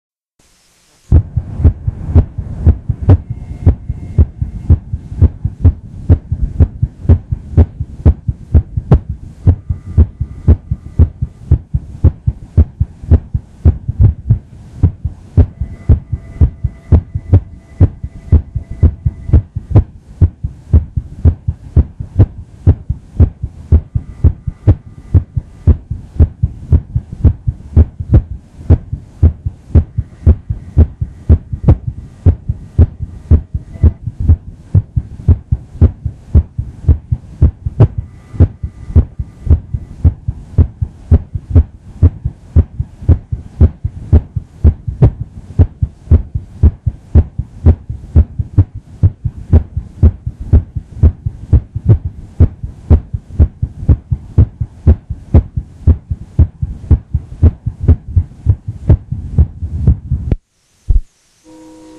Irregular Heartbeat
Real heartbeat of a sixteen year old male (tall slender build). It is irregular, fast, and loud. This one minute cassette tape recording was done with a microphone on the chest over the tricuspid valve region near the left sternal border.
heart, heart-beat, irregular